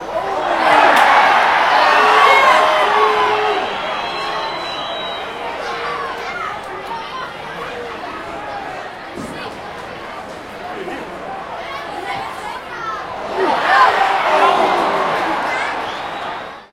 Soccer Stadium 04
Field-recording of a Dutch soccermatch.
Recorded in the Cambuur Stadium in Leeuwarden Netherlands.
crowd, football, match, public, stadium, soccer, field-recording